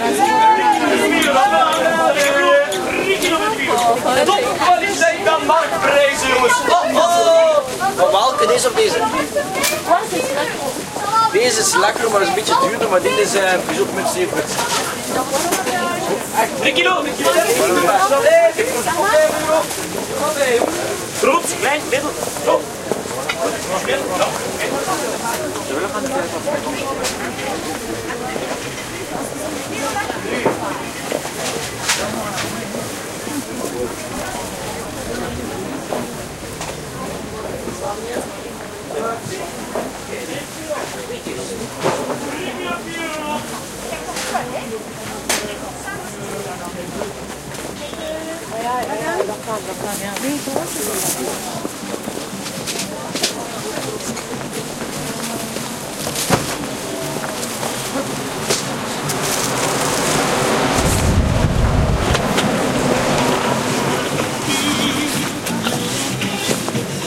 20100402.Gent.market.01
lively market ambiance in Gent (Belgium) with male and fenale voices speaking Dutch, traffic, and some wind noise. OLympus LS10 internal mics
ambiance, voice, gent, dutch, female, city, market, male, field-recording